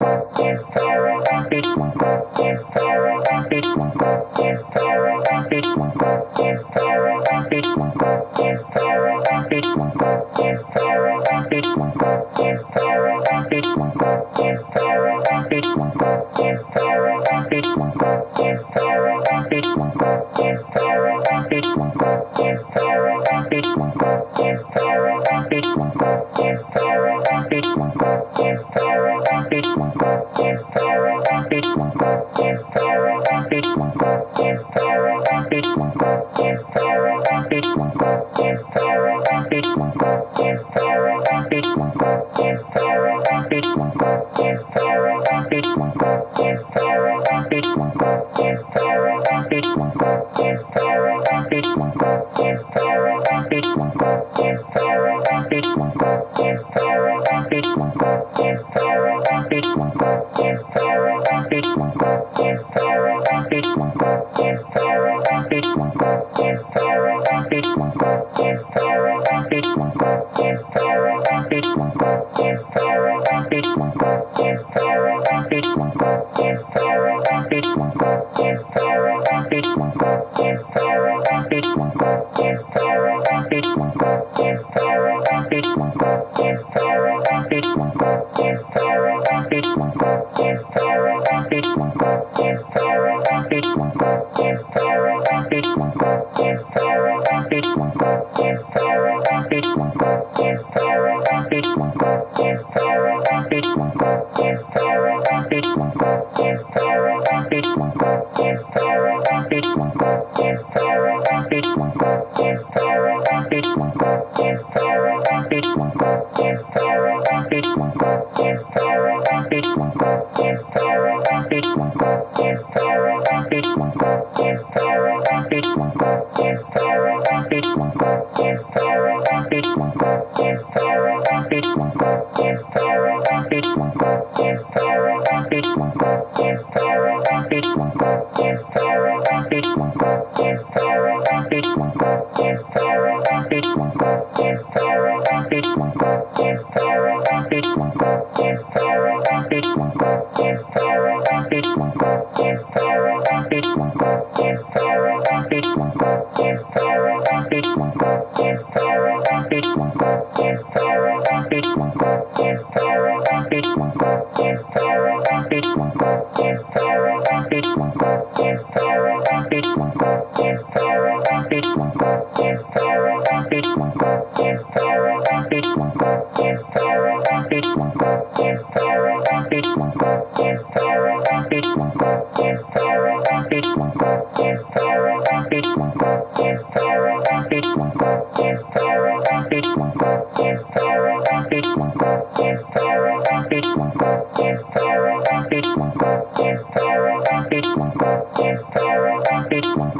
free music made only from my samples
This sound can be combined with other sounds in the pack. Otherwise, it is well usable up to 60 bpm.